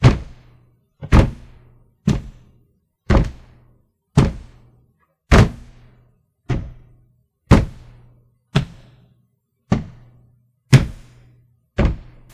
foot stomps ok